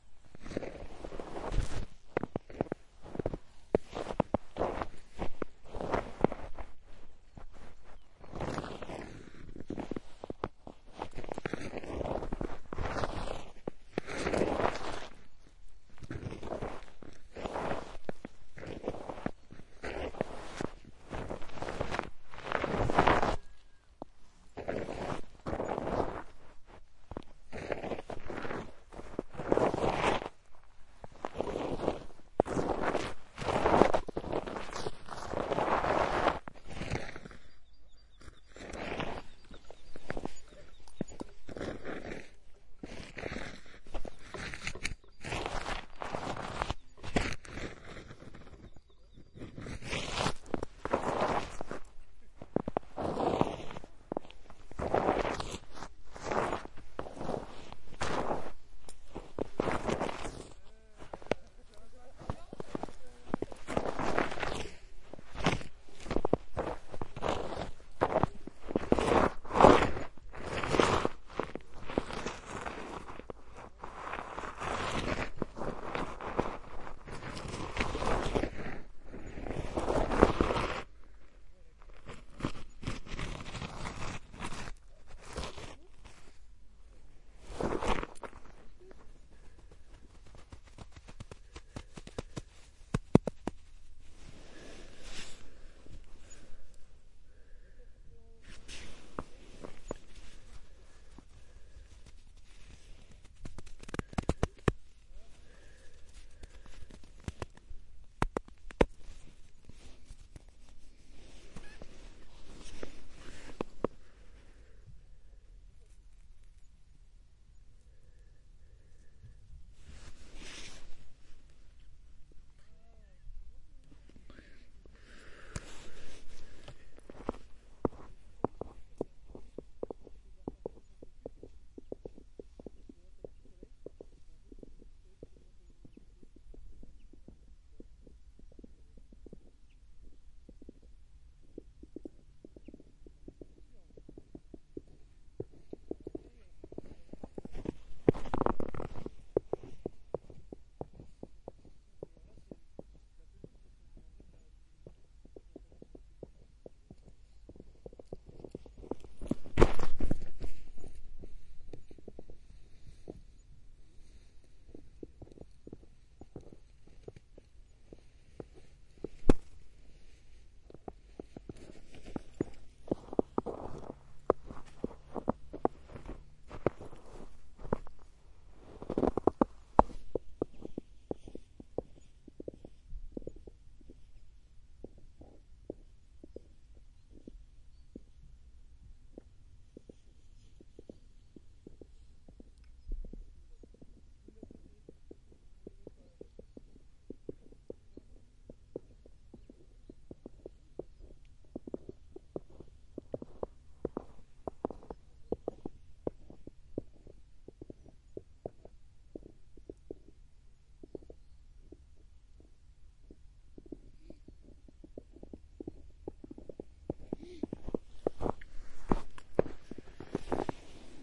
Snow Movements
Snow, Sound-effect